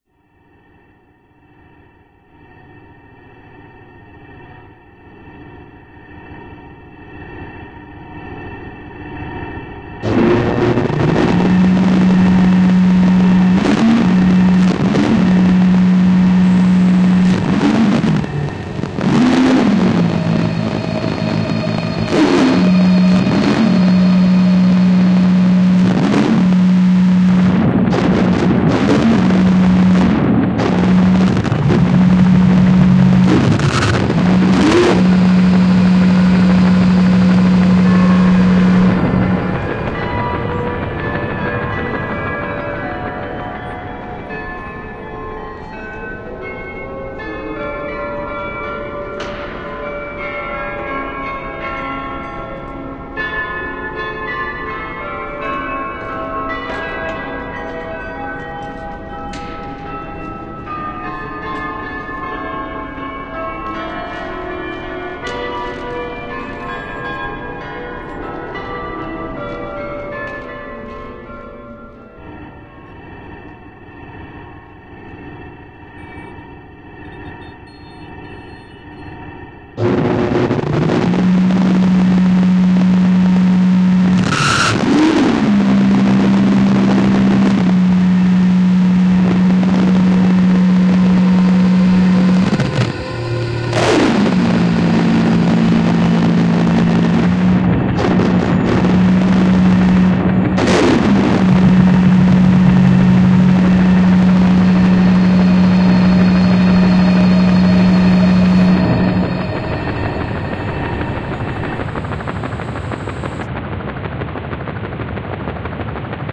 stone castle horror flick
Samples used:
a microwave
a child's time-stretched and reversed bellow
church bells and a couple other samples
Mention me and send me your work if you used this in anything.
ANY feedback is welcome
sound, track, dark, eerie, horror, ominous, soundscape, film